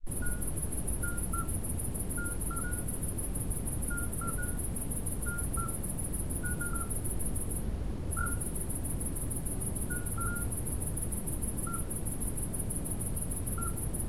Midwife toad extract
Two midwife toads calling. Dordogne, France 2007.